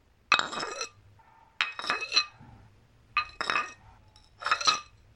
Sonido de una taza rozando con otro objeto hecho de cerámica
Cups, Roce, Tocar